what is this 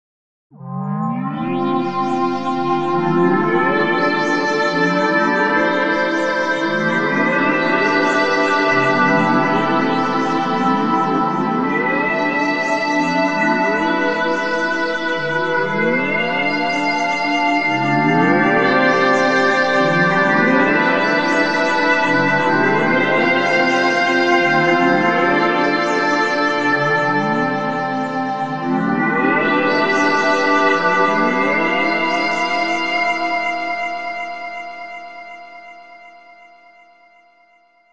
Ambient Chords 6
chords, synth, ambient, pad, texture, space, synthesizer